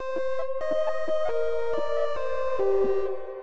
Pad riff 4
riff, 4, pad